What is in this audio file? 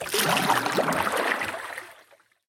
Water Paddle heavy 029
Part of a collection of sounds of paddle strokes in the water, a series ranging from soft to heavy.
Recorded with a Zoom h4 in Okanagan, BC.
water splash river lake zoomh4 field-recording
lake, water, field-recording